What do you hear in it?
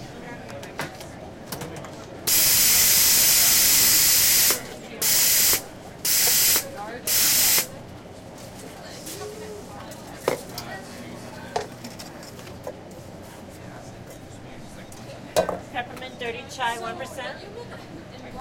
The Making of a Latte
A barista making a latte and calling out the order, a larger bistro that is full of people and conversation.
barista, boiling, coffee, coffee-maker, gurgling, latte, order, steam